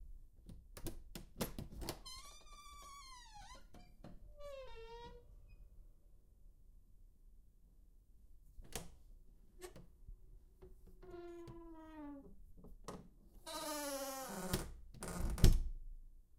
Squeaky Door - 110
Here is the sound of a door creaking and squeaking by opening and closing.
Note: I've recorded this sound using too low microphone sensibility, try to correct this by increasing the gain settings in your software.